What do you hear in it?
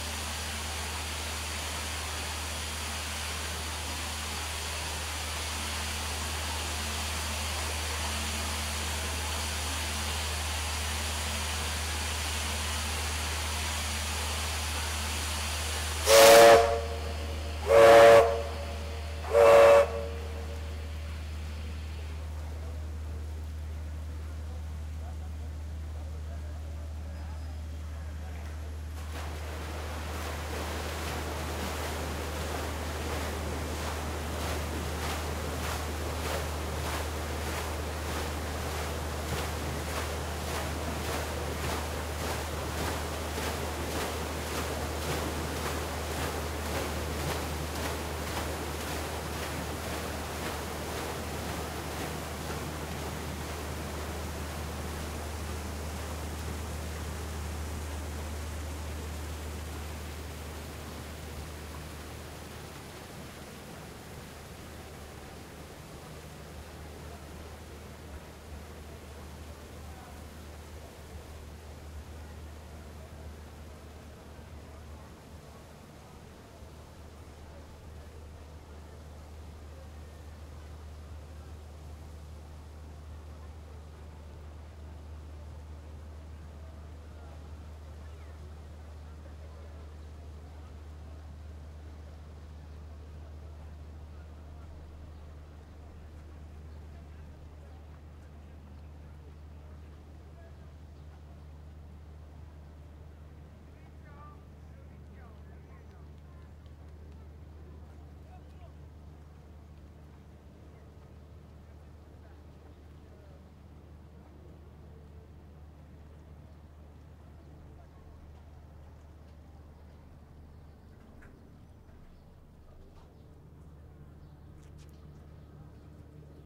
geneva lake boat on departure from lausanne
departure of a paddle steamer boat from CGN fleet on lake leman.
boat, field-recording, paddle, steamer